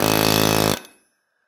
Pneumatic chisel hammer - Atlas Copco rrc 22f - Forging 1b
Atlas copco rrc 22f pneumatic chisel hammer used to force hot steel once and it sounds loose.
forging, metalwork, 1bar, air-pressure, pneumatic-tools, atlas-copco, crafts, chisel-hammer, motor, 80bpm, steel, tools, work, pneumatic, blacksmith, red-glow, labor